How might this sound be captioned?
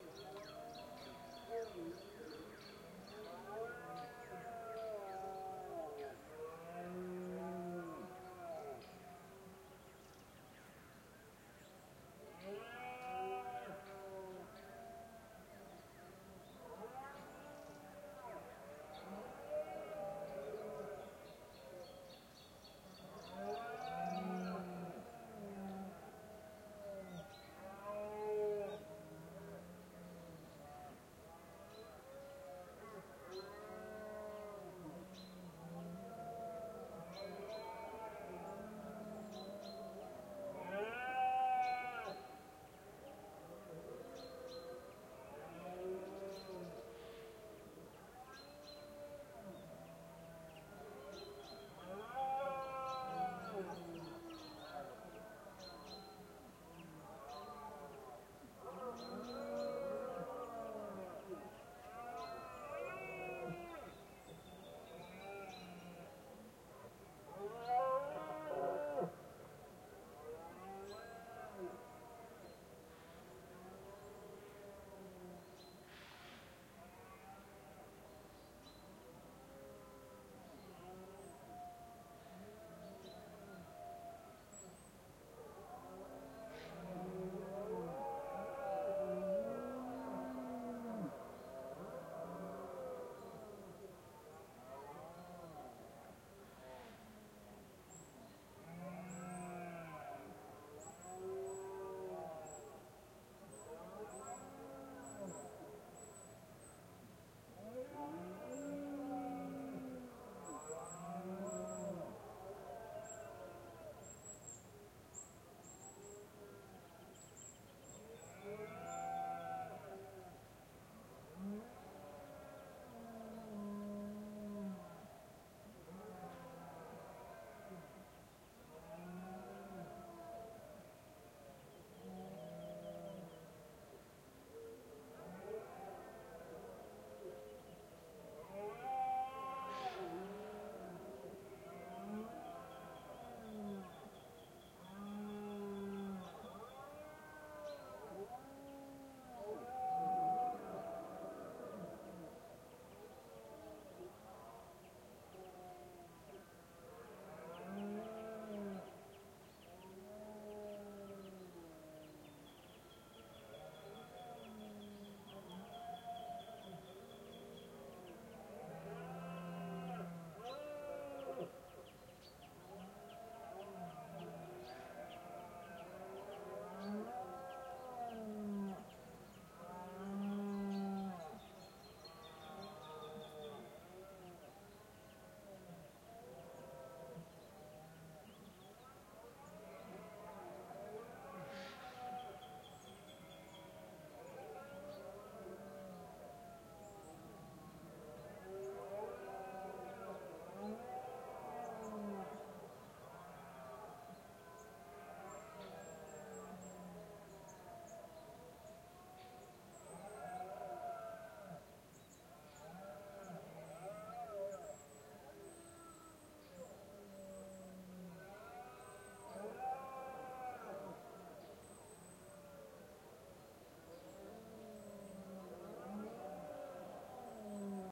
20080930 0831 cabañeros berrea FR2LE NT4
Recorded in Cabañeros Nacional Park. In this recording can be listened the bellows of deers. This event take place in the last weeks of September and early October called in spanish "berrea". Fostex FR2-LE. Rode NT4. 30/09/2008. 08:31.
autumn, berrea, cabaneros, deer, nature, toledo